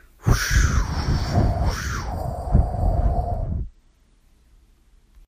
wind breeze swoosh air gust
air, breeze, gust, swoosh, wind